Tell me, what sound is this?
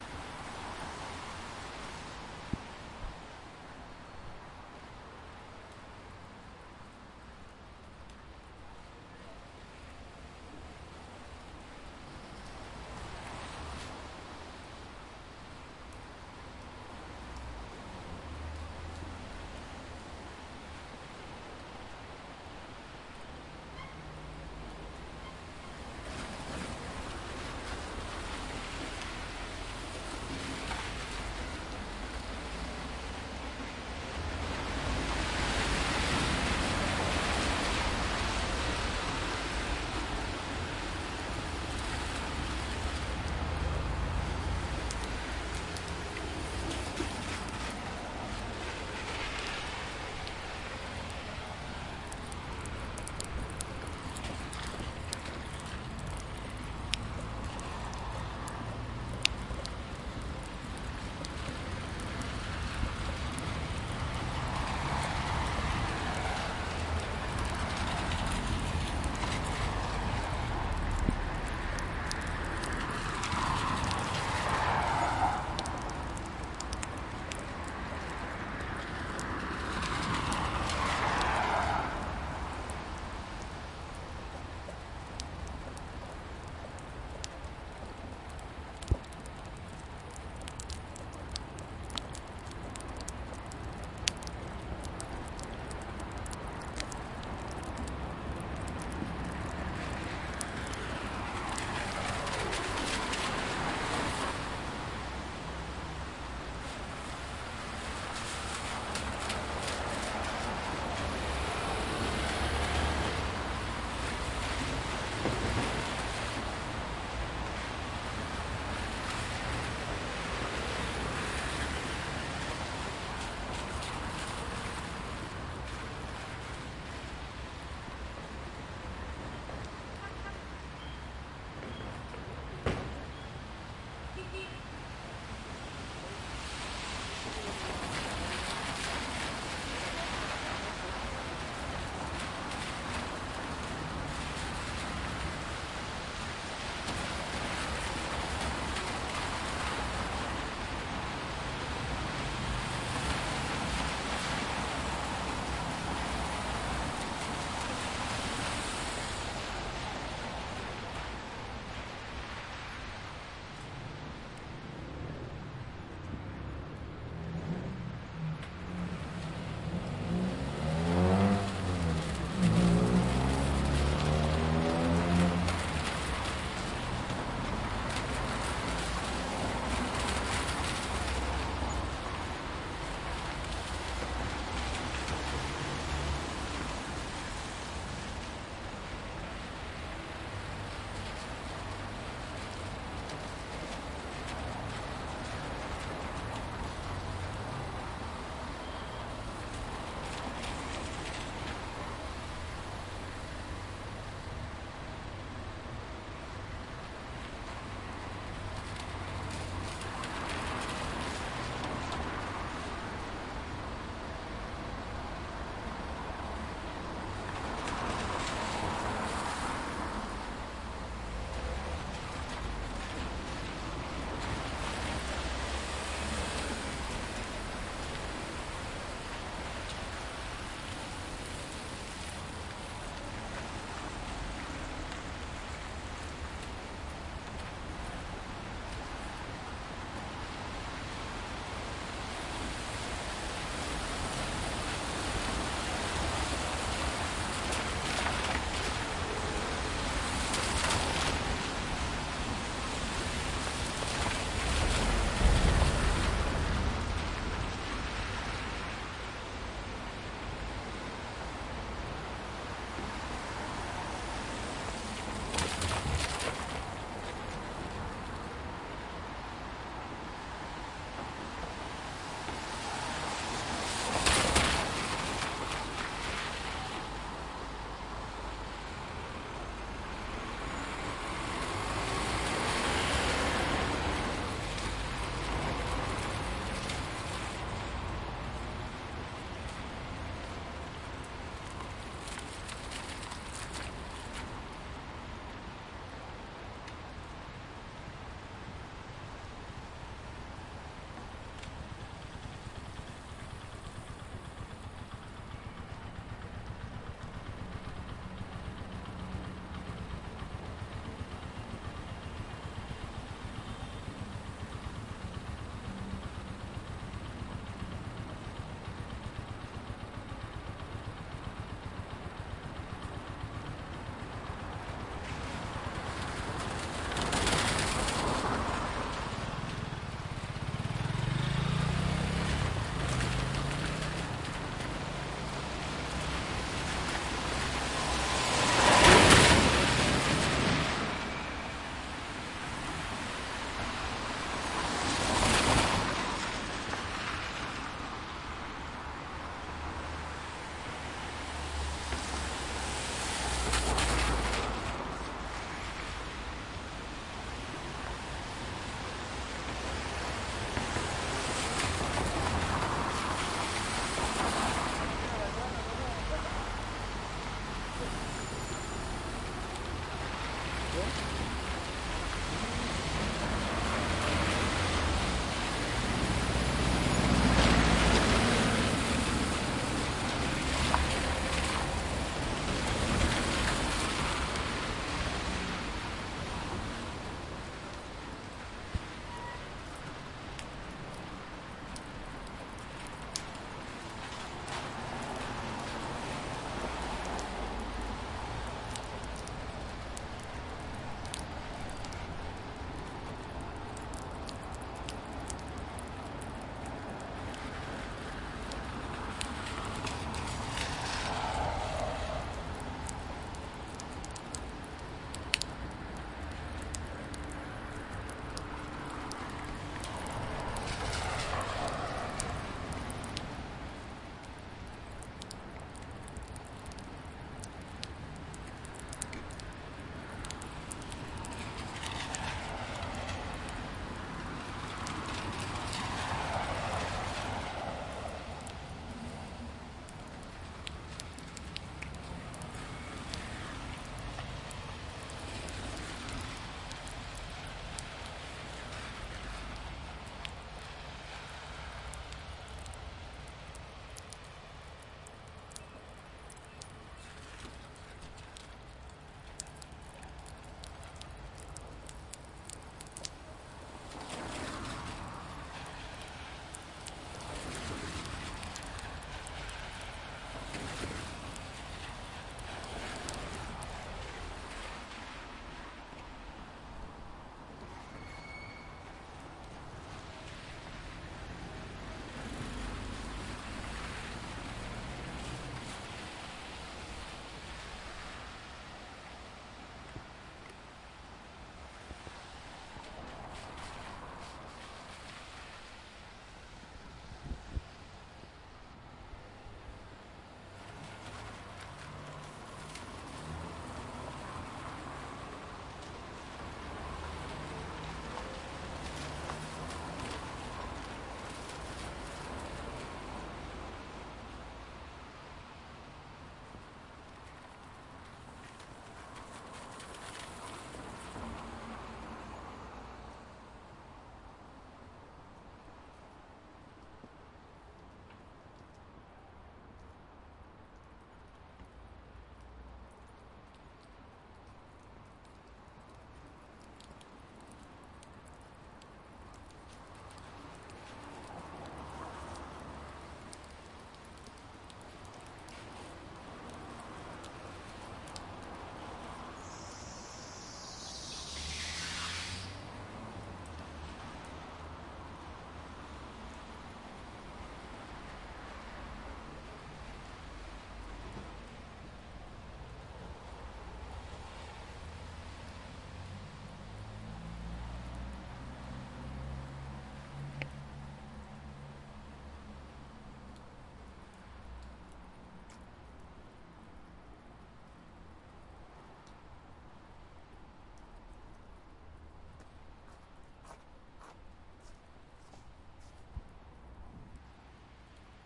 Light rain at night with cars passing by
Many cars pass by at the intersection, splashing water.
City night ambience on a light drizzled noon. You may hear a couple of distant voices from people passing by, and motors humming from vehicles close and far from the mic. Motorcycles, SUVS and small cars mostly
The pan is a bit messy at times, I recorded this without headphones and got a bit distracted, using a Tascam DR-40 and it's built in mic
Some heavy dripping action around second 0.50 and also around minute 6.50. You can hear a car squealing loudly around minute 9.
street, wet, doppler, city, water, weather, raining, raindrops, cars, traffic, rainy, raindrop, drops, dripping, motor, motorcycle, streets, rain, suv, light-rain